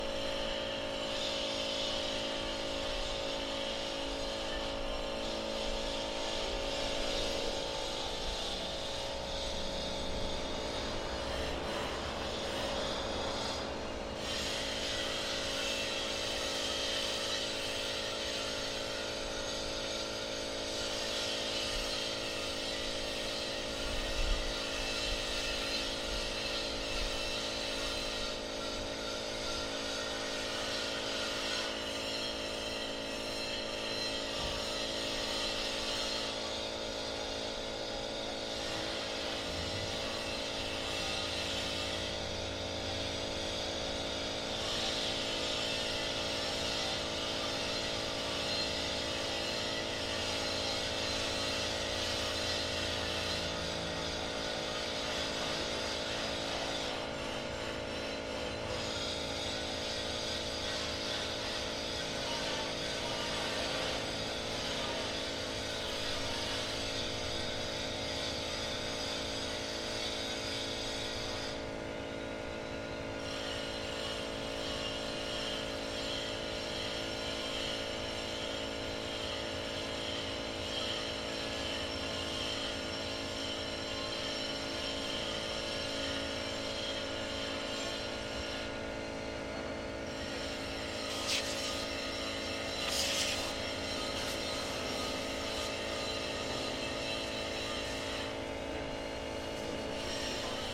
Ambience Industrial Metal Shop
industrial, ambience, metal